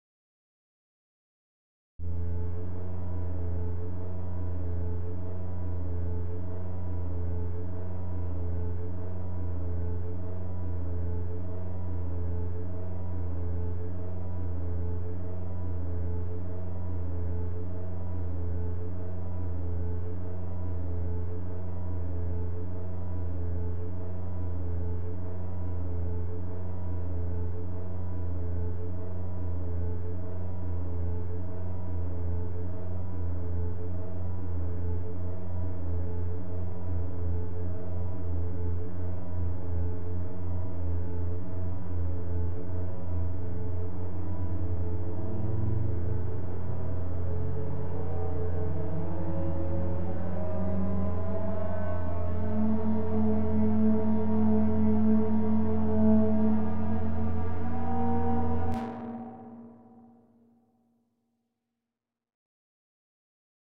An empty interstellar freighter cruising for about 45 seconds and then accelerates to a maximum.
acceleration, drone, empty, Modulating, reflections, vibrato